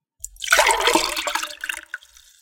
Water Pouring Quickly Into Glass
Water being poured quickly into a glass
- Recorded with Yeti mic
- Edited with Adobe Audition
glass-of-water, liquid, short-clip